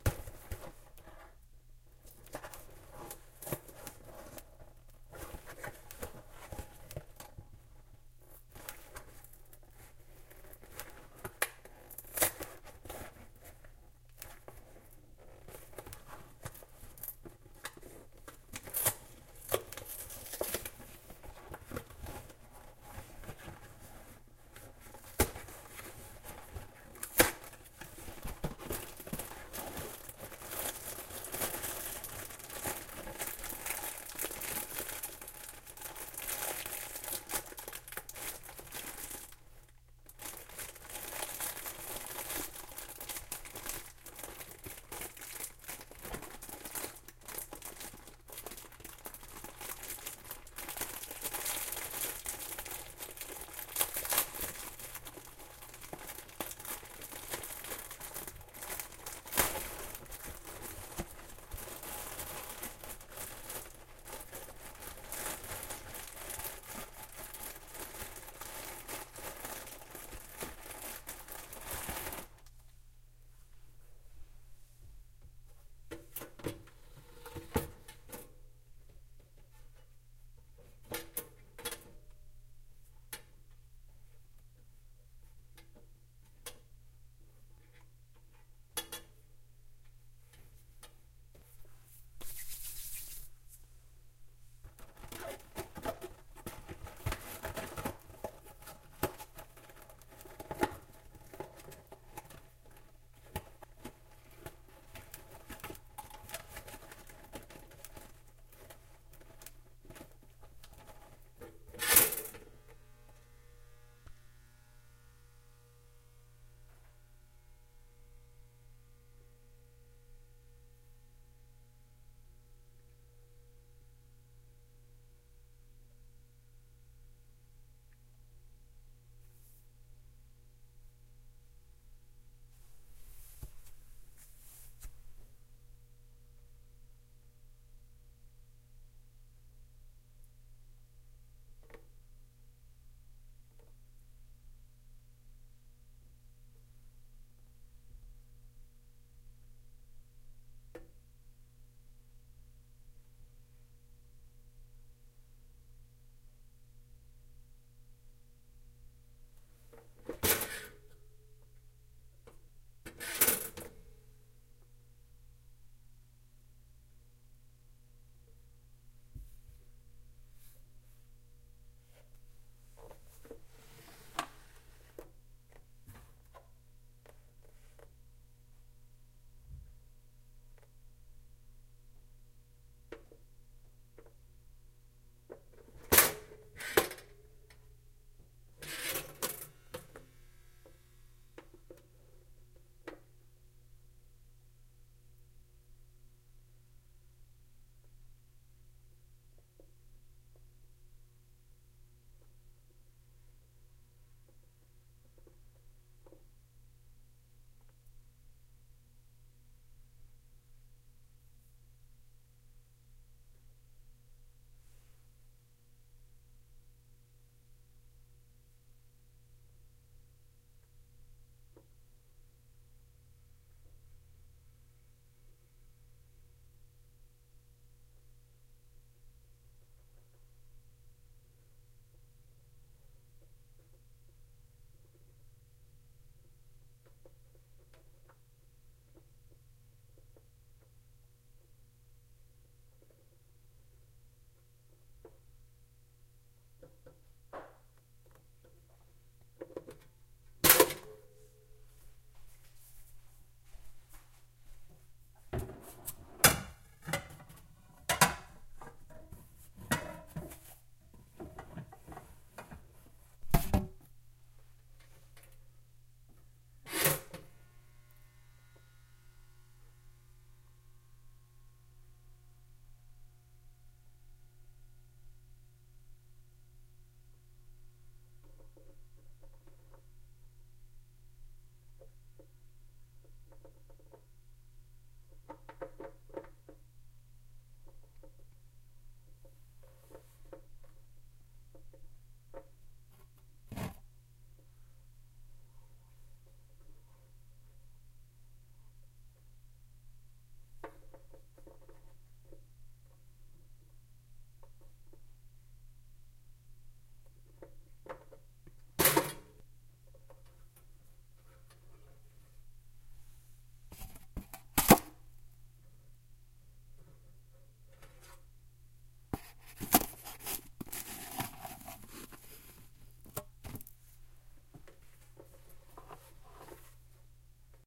A recording of making my usual breakfast in the not so early morning. This recording includes opening the box to putting in the toaster waffles. Enjoy!